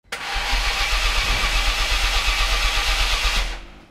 Maserati ignition 2
engine, automobile, car, ignition, vehicle, sports